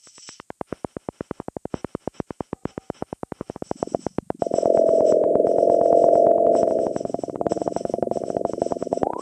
Making weird sounds on a modular synthesizer.